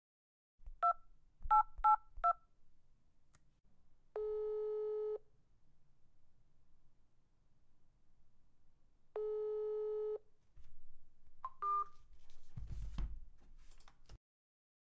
Phone Sounds
calling, ring, call, phone, mobile, telephone, number, type